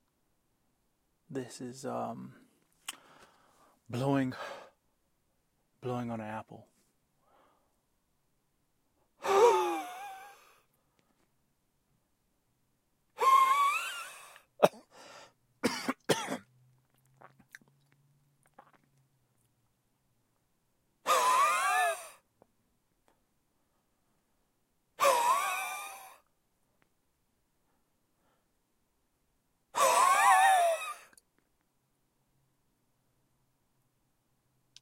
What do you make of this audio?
hot breath from mouth
air, blow, hot